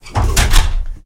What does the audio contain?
sfx heavy door
a percussion sample from a recording session using Will Vinton's studio drum set.
close, door, heavy, slam, thunk